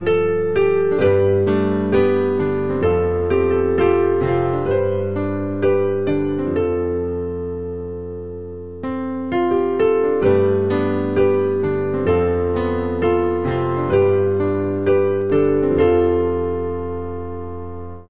Piano Piece (from Million Words)